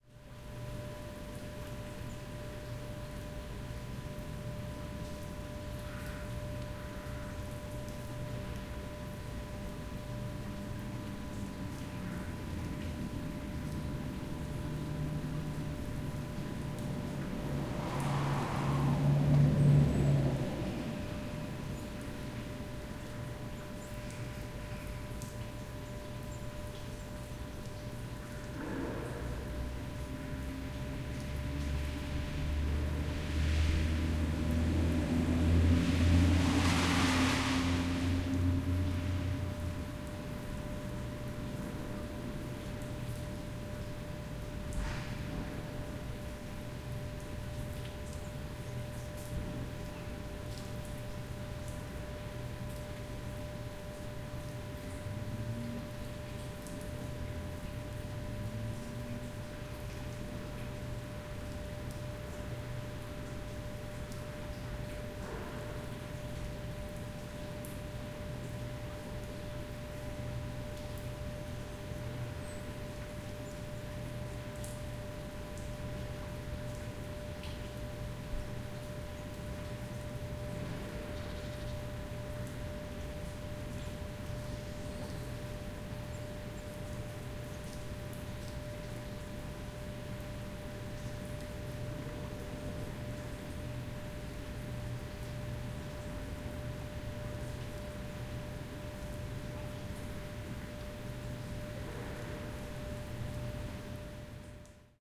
11.08.2011: twelfth day of ethnographic research about truck drivers culture. Oure in Denmark. In front of fruit-processing plant. Evening ambience: raining, drone from the factory, rumbles, cawing birds, passing by cars.